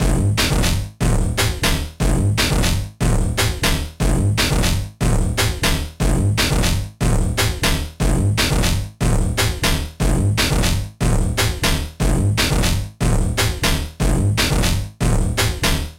MOV. Beat. 120
computer beat Logic
120-bpm, beat, electronic